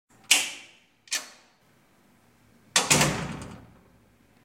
Door opening and closing
A door is opened then closed